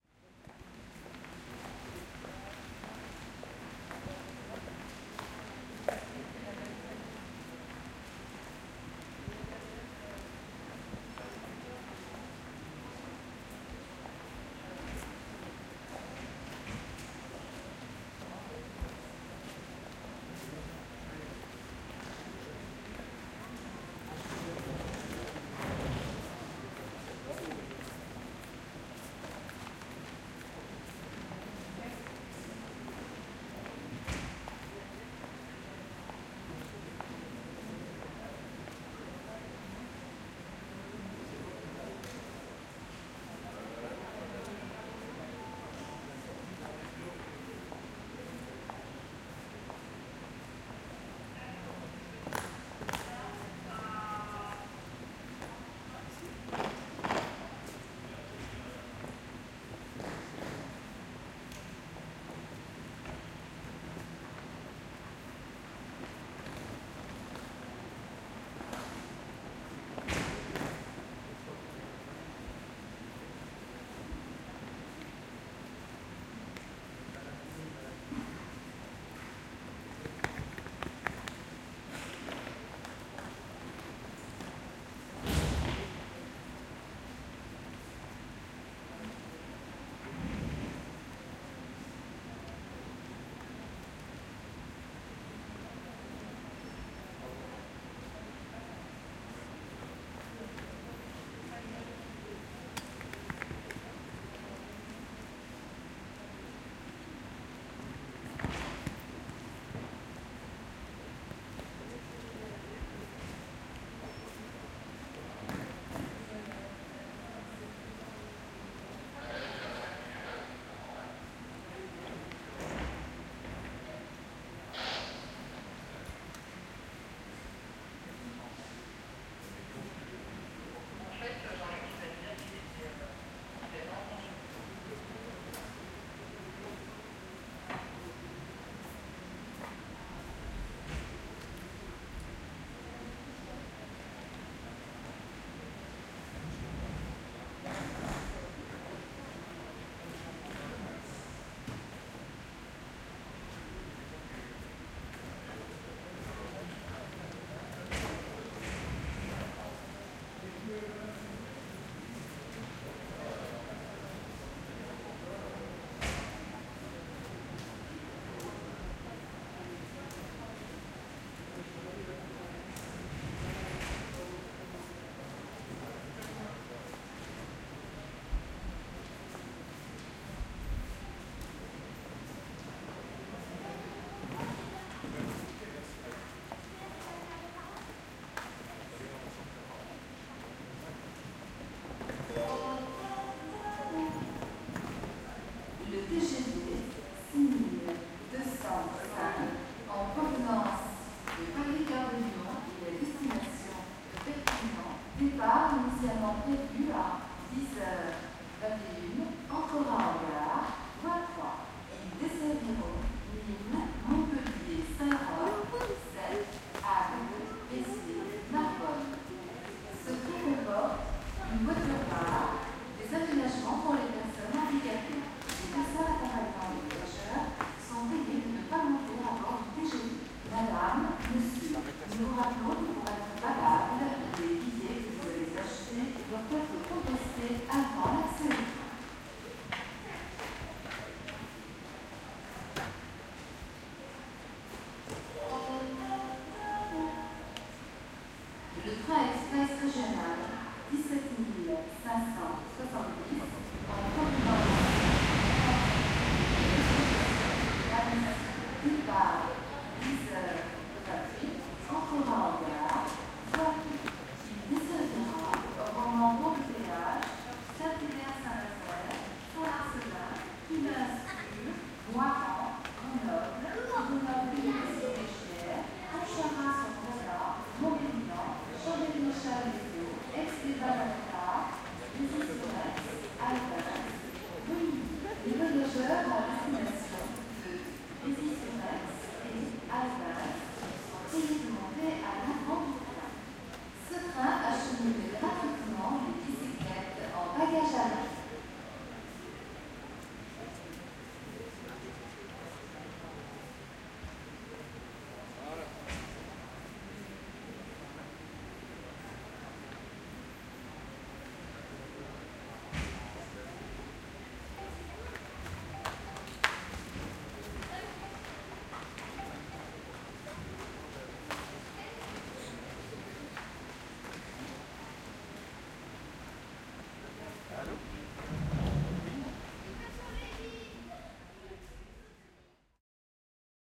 Train Station ambience
Just let record an ambience in the train station at Valence TGV. Multiples and typicals sounds from a train station. Like French announcements. more quiet ambience than the previous recording
announcement, crowd, field-recording, France, noise, platform, rail, railway, station, train, train-station